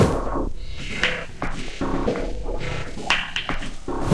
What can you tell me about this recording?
Ugly delayed loop.
loop, rhythm